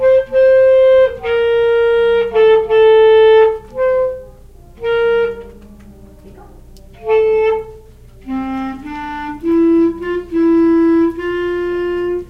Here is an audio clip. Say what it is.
Ben Shewmaker - Clarinet Test 1
Beginner band clarinet test
clarinet, woodwind